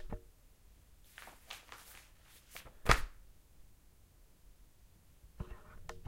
Putting down a folded newspaper
Folded newspaper handled and then thrown down on to a hard surface.
Newspaper, paper